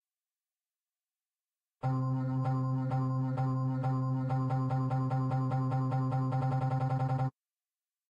Audio Remix 2
A piece of music I did for one of my remixes but I didn't get to use it. Well, anyway.
Created in 3ML Piano Editor.